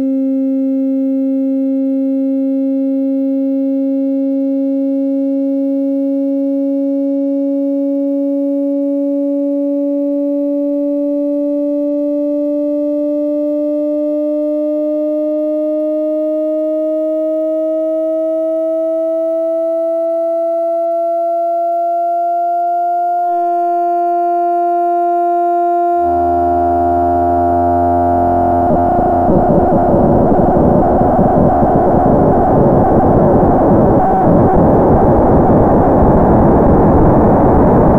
Kamioka x-Mod 2 mono 0.25-0.50
A little dare I am running with user gis_sweden.
The challenge was to create a sound where 2 oscillators cross-modulate each other.
gis_sweden will use his modular synth. I will use my virtual modular synth Kamiooka.
You can hear gis_sweden's sound here:
My sound looks very interesting if you change the display mode to spectral.
For this sound, I tried to focus on the region of cross-modulation values that produce an interesting sound.
The parameters I have used:
2 sin oscillators
cross-modulate each other (FM)
Cross-modulation ramps up linearly from 25 to 50% during 20 bars at 120bpm
Created with Kamiooka in Ableton Live
Sound converted to mono in Audacity. No effects or processing.
cross-modulation, kamiooka, synth, virtual-modular, VST, x-modulation